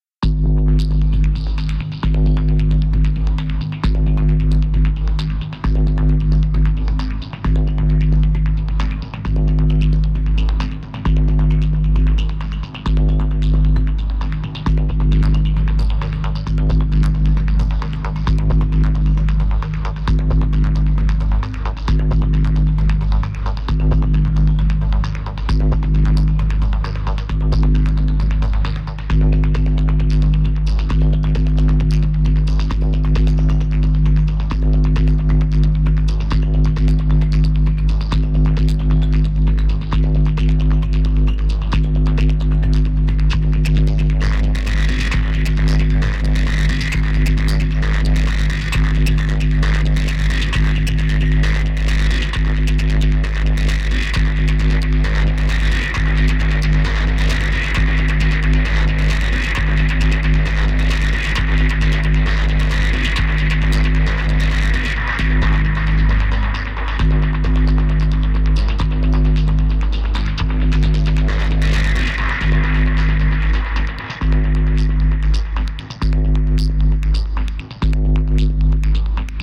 Drum kit in ableton with corpus effect. Bass heavy tom sounds great for techno track.
130-bpm, beat, drum, heavy, pipe